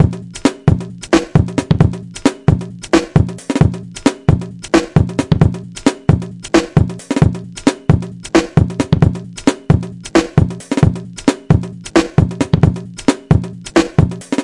133-casio-raver-beat
casio, breakbeat